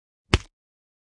The isolated punch sound I created for all of my "Kung Fu punches".
action, crack, fight, fighting, fist, funny, hit, impact, pow, puch, punch, smack, sucker, sucker-punch, whack